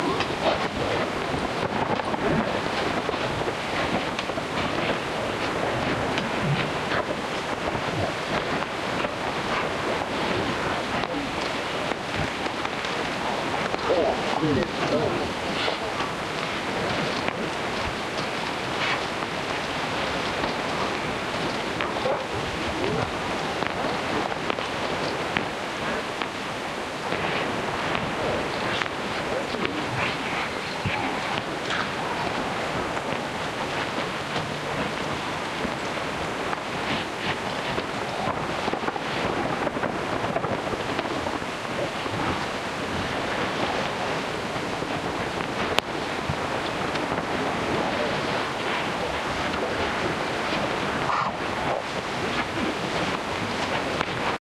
a Zoom H4n Pro recording of a particularly quiet moment in the London City Hall, heavily processed and repitched in Ableton.
ambience, ambient, atmosphere, dark, drone, gritty, grunge, processed, tape, texture